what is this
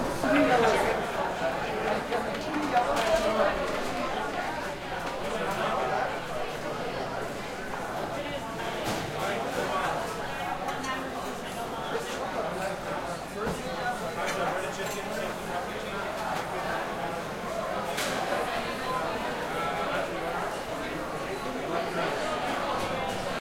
crowd int high school cafeteria medium seniors busy heavy echo light electric buzz